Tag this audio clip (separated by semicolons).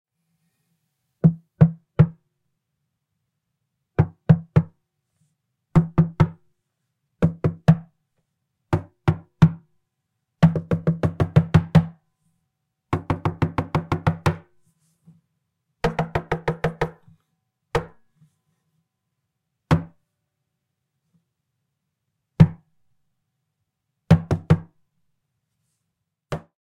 doors; horror; house